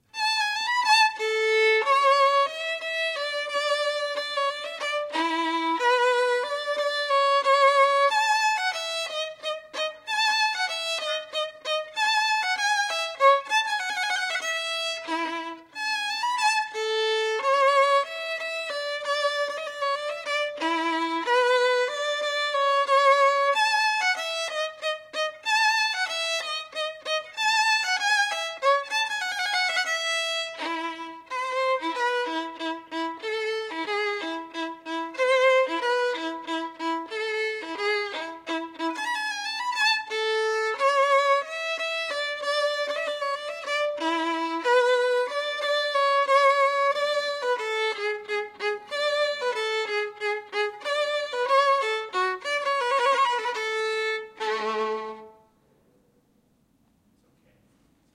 violin minuet boccherini (edit)
Violin solo. A short melody of Luigi Boccherini's minuet from his String Quintet in E, Op.13, No.6.
We're producing a Sherlock Holmes play this month, and we needed a to hear Holmes play a bit at the opening of one scene.
Played by Howard Geisel
2 SM58s about 1meter apart .7meter away from the violin - Mackie Mixer - Audigy soundcard
solo, classical, music, environmental-sounds-research, violin